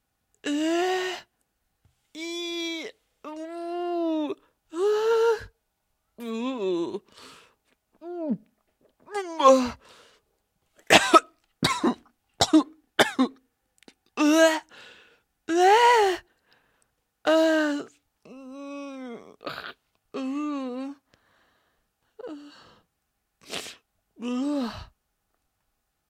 AS092866 disdain
voice of user AS092866
aversion, contempt, despite, despitefulness, disdain, disgust, female, loathing, voice, woman, wordless